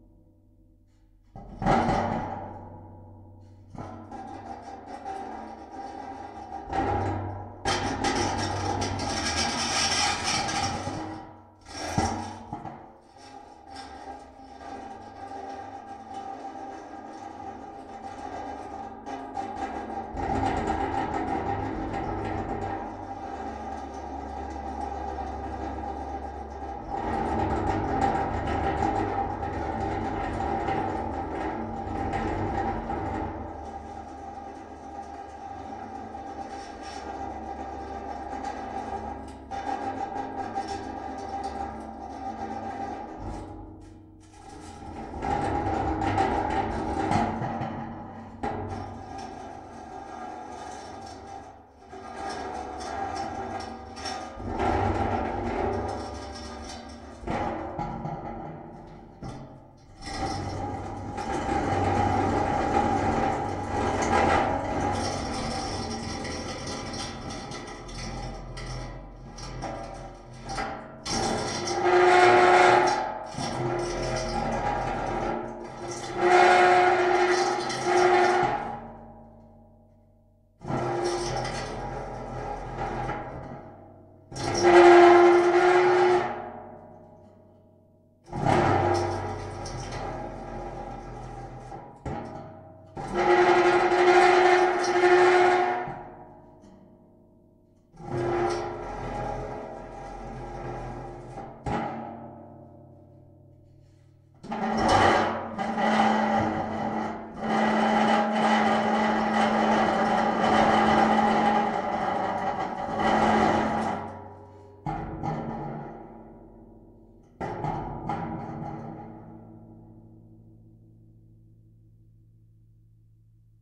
Contact mic on metal chair 3
Contact mic on metal chair 1
metal, contact-mic, scratch